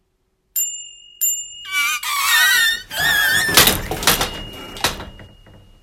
BAIXERAS Benjamin 2020 2021 BikeAccident
Using a bicycle horn and a compressed air inflator, I wanted to recreate the sound of two bicycles braking and crashing into each other. I used a synthetic sound to make the bikes collide with each other.
accident, bicycle, bike, braking, horn, rider, shock